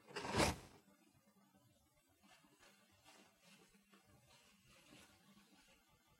Taking a tissue out of the box
Removing a tissue from the box
package, cardboard